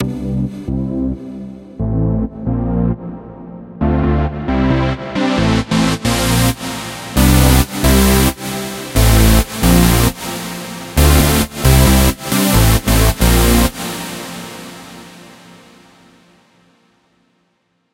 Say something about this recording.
INTRO Dance 02
Intro for a song, dance, electro style.
Made with FL Studio, Sytrus VST and more.
trance, dance, techno